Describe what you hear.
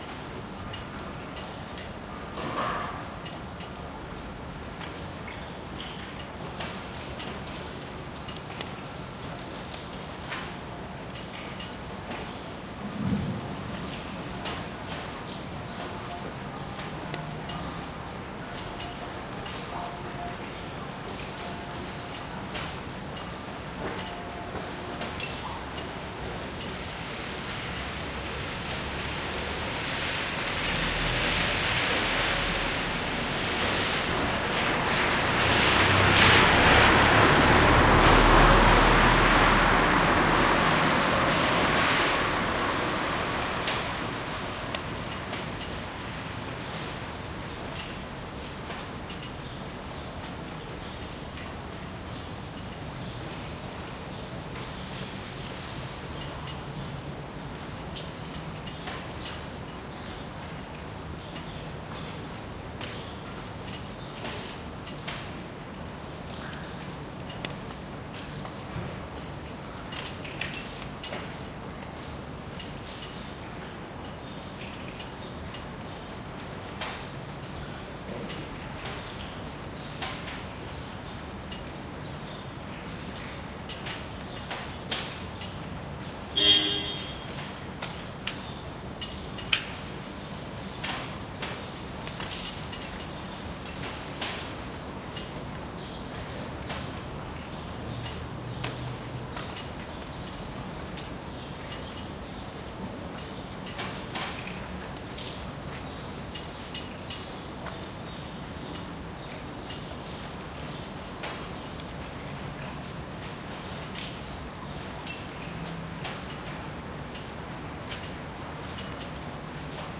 rain falling slowly in the city, a passing car... and rain that keeps falling as if nothing
rain, city, horn, car, field-recording